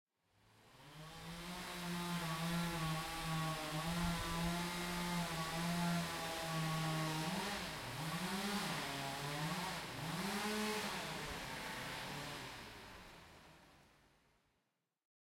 14GNechvatalovaJ-bulding-works
distance perspective, outdoors
Recorded on ZOOM H1 recorder
CZ, stone-cutting, Czech, Pansk, Panska